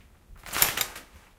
shower curtain fast
opening a shower curtain quickly
open, shower-curtain, curtain, bathroom, shower